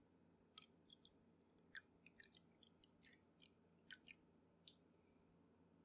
water pour 2
Second sound of water being poured from a teapot into a tea cup.